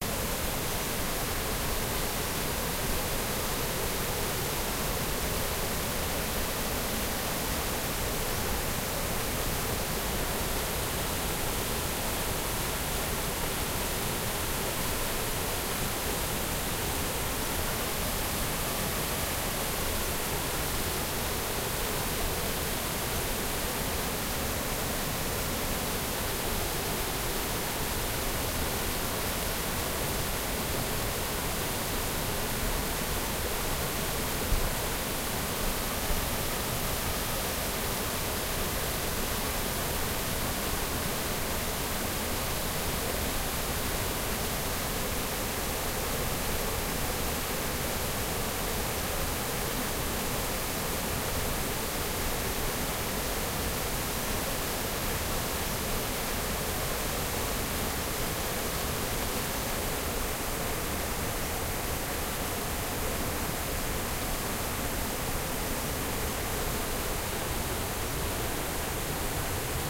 gory wodospad
The sound of a waterfall on a stream in Polish mountains - Pieniny